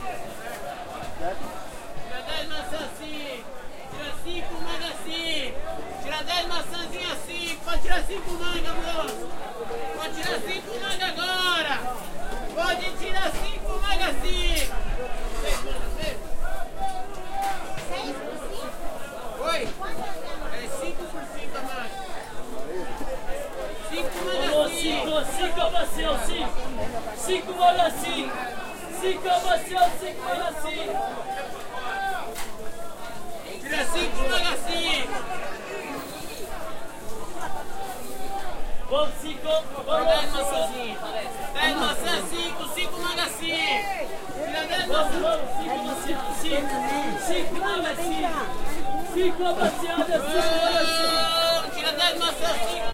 Street fair with salesman shouting
Sound of a street fair with a salesman shouting for selling apples and mangos with crowd noise. Portuguese language. Recorded in São Paulo city in Brazil, 2019.
brazil, rua, street-fair, vendedor